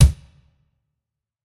A very rock bass drum with more gain and pressure.
rock, drum, bass